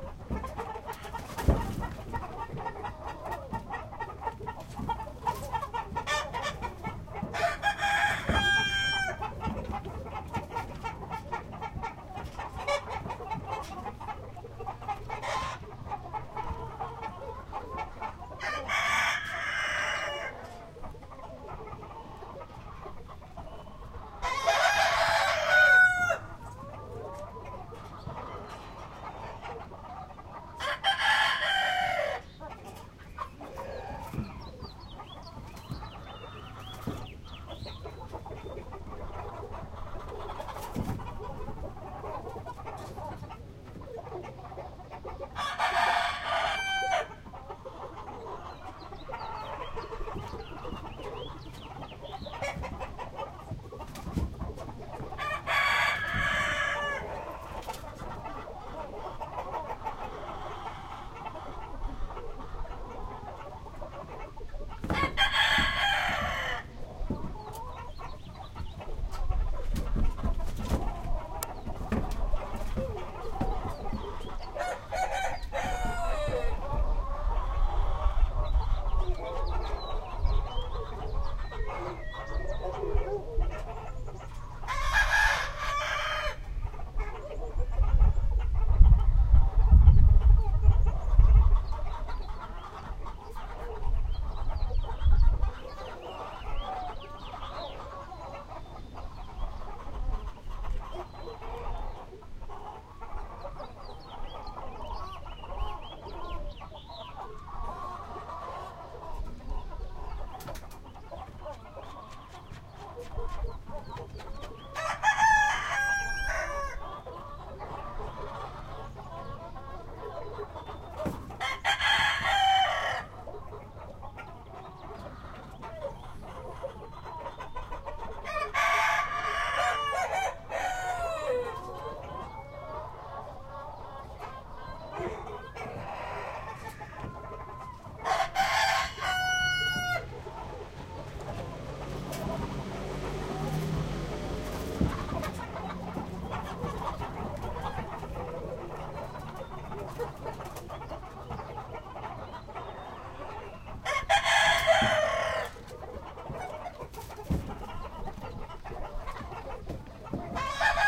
chicken flock
clucking, farm, chicken, rooster, cluck, hen, chickens, cock, chirp, bird